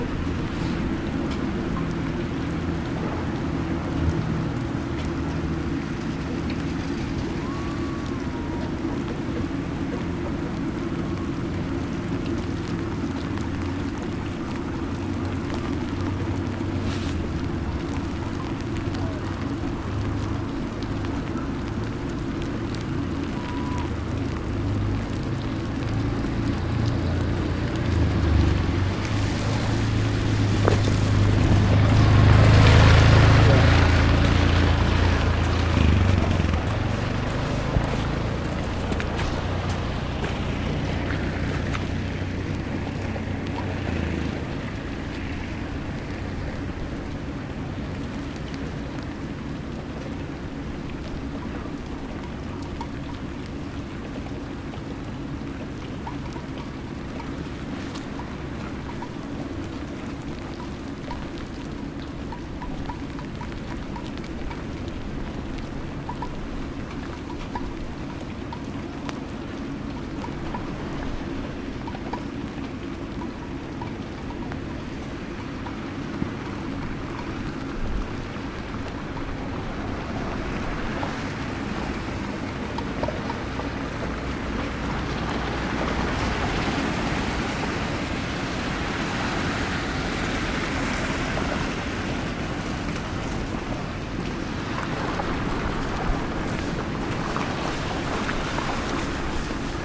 east greenland ittoqortoormiit 20060915
Noon recording. Rainy day. Traffic on dirt road in small greenland village. Marantz PMD 671. Sennheiser stereo handmic.
atmosphere, rain, village